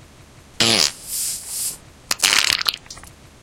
fart poot gas flatulence flatulation explosion noise weird
explosion, fart, flatulation, flatulence, gas, noise, poot, weird